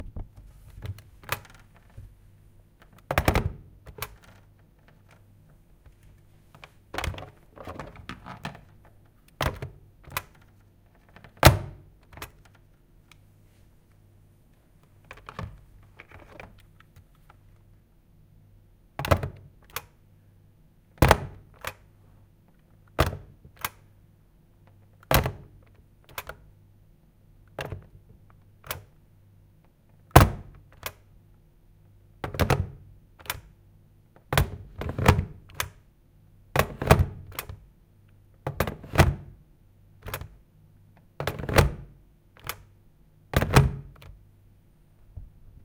Telephone hang off in different ways
hang-off, phone, telephone